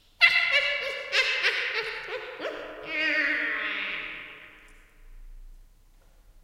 This is an evil witch. She is laughing. Have fun!
Hi! It´s me again guys! I couldn´t upload any Sound because my mic was broken.
But now I´m back!